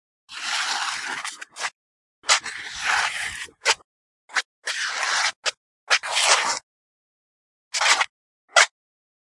189232 starvolt shuffling-2-rear(EDITED LOUD VERSION)
foot-scrapes, concrete-scrapes, shuffling, shoe-scuffs